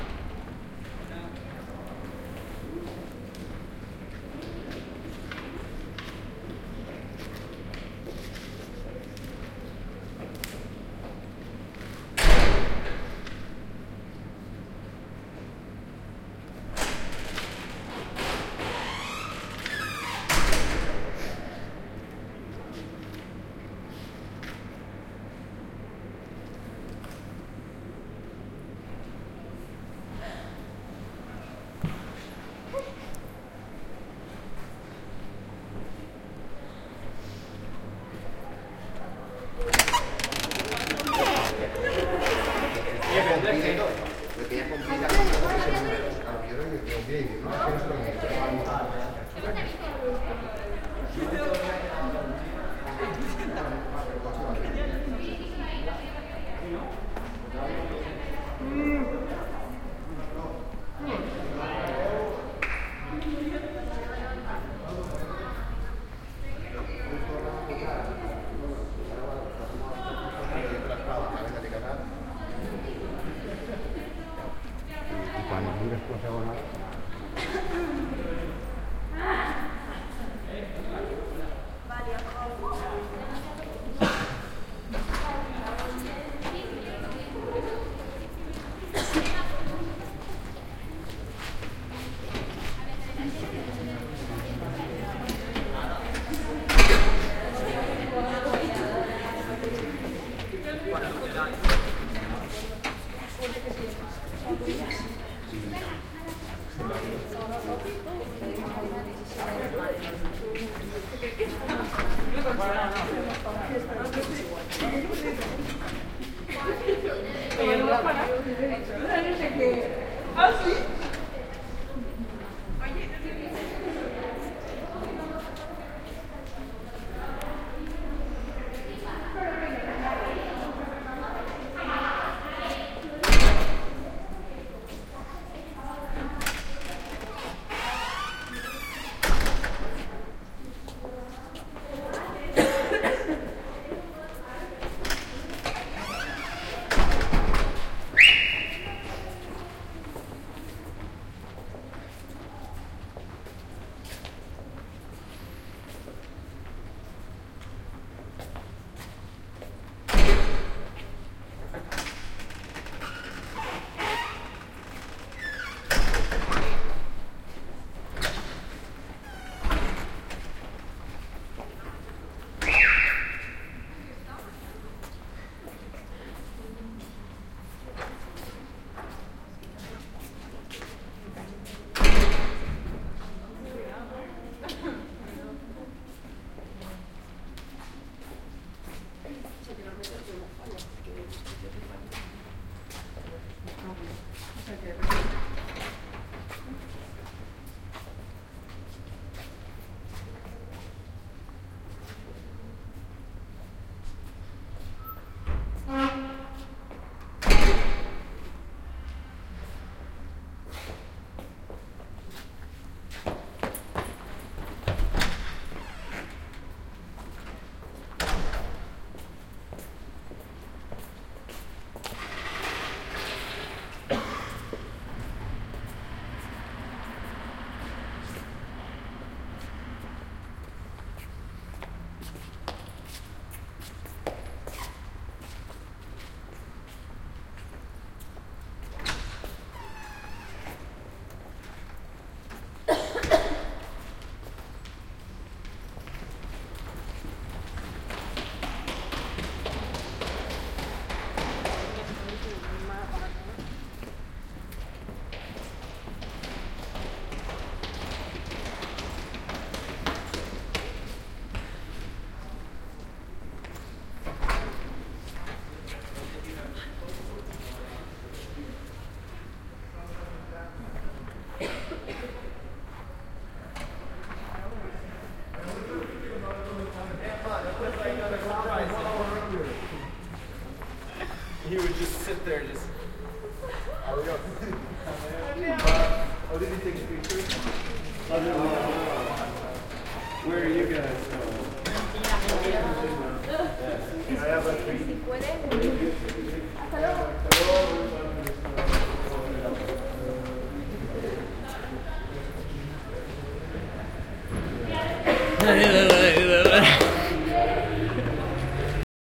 Door of a bar of the seaport
This sound was recorded at the door of the bar of the seaport of Gandia's Beach. We can hear young people walking, running, whispering, shouting and opening a door.